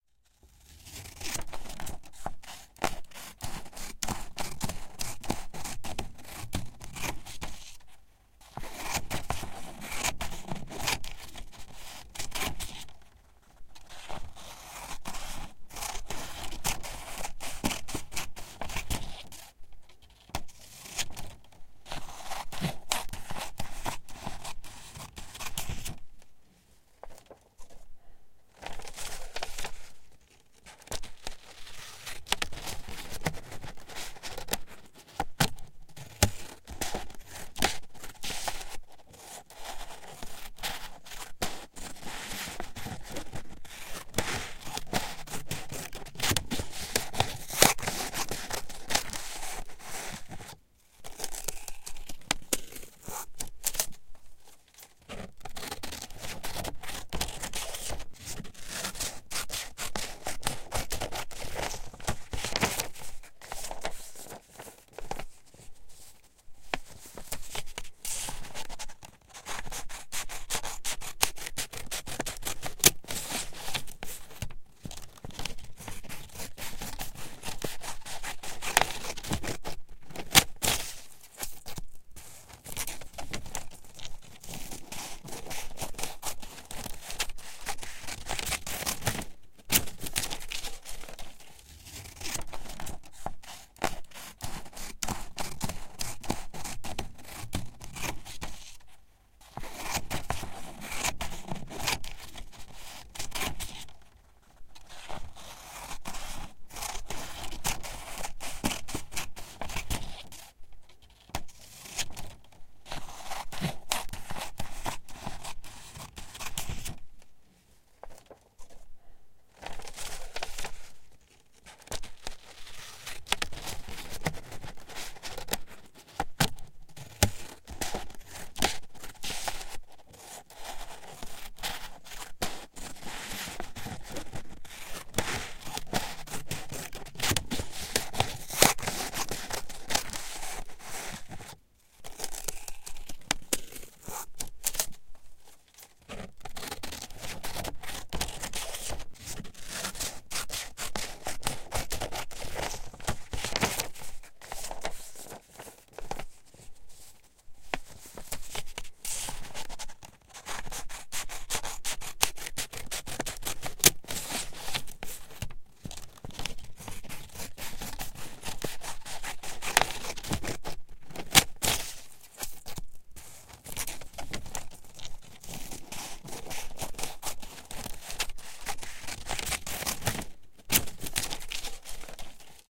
Cutting paper. Recorded with Behringer C4 and Focusrite Scarlett 2i2.
cut, cutting, paper, scissor, scissors